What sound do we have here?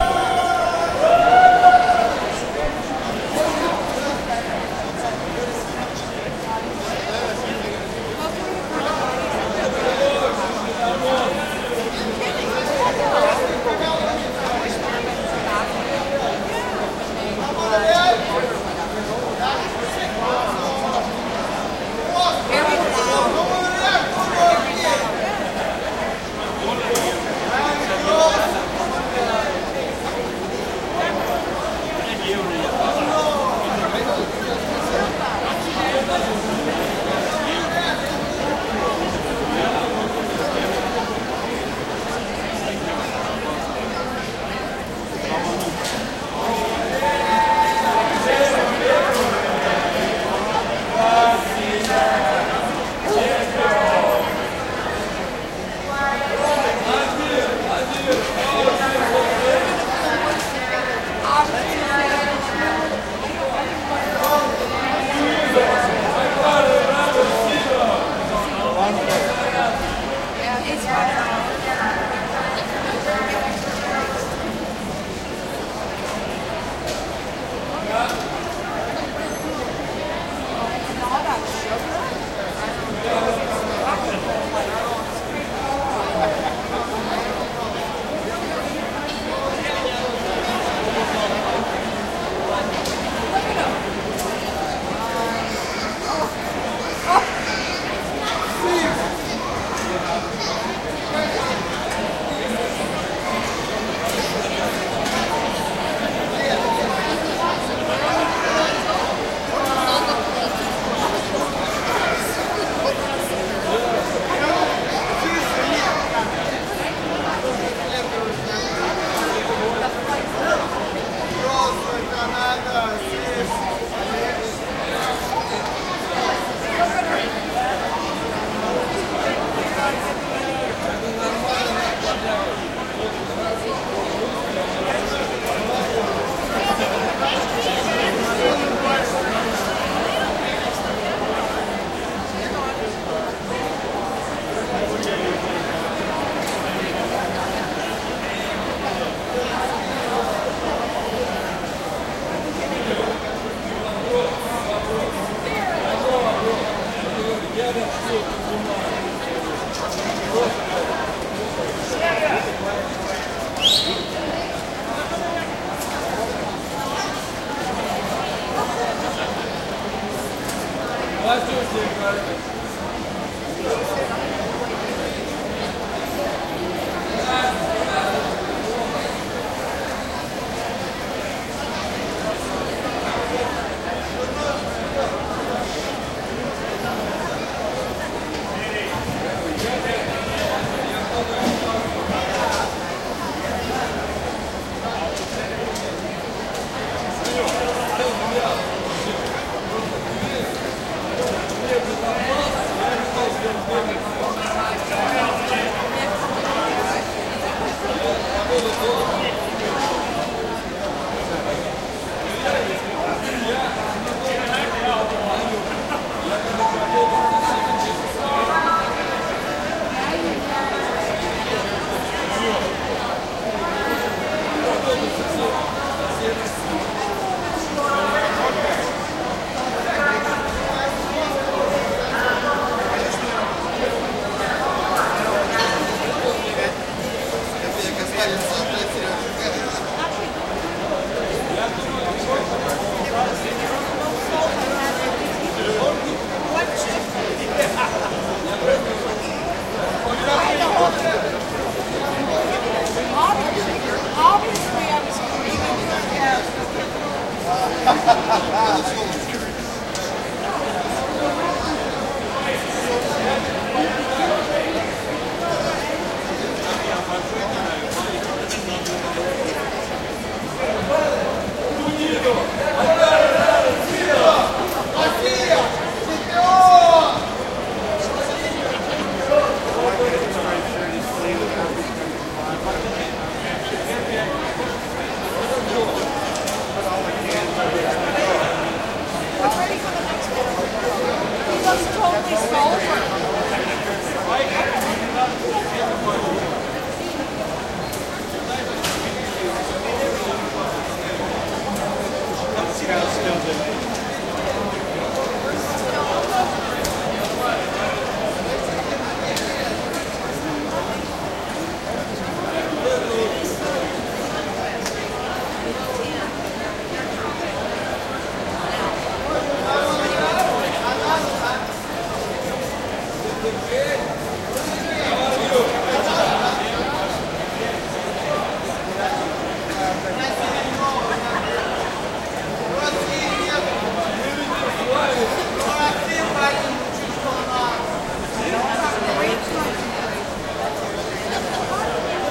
airport waiting area busy2
airport waiting area busy
airport, area, waiting, busy